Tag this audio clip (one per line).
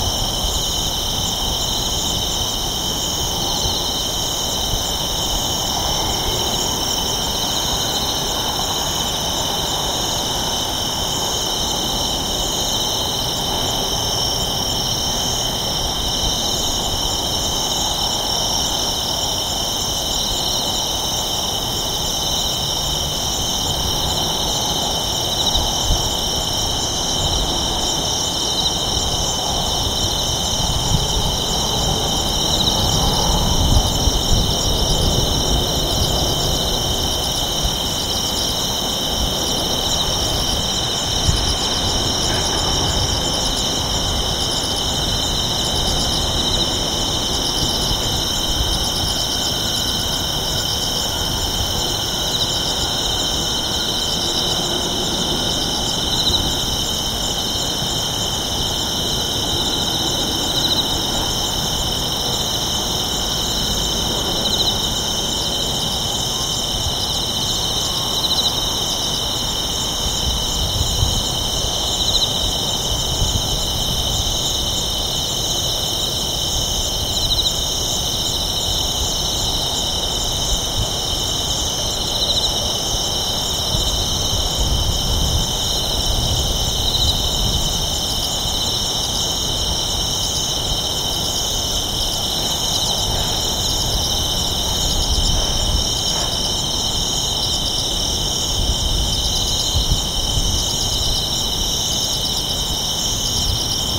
ambience
ambient
bugs
cicadas
crickets
field-recording
japan
late-summer
nature
night
quiet
town
traffic
village
walk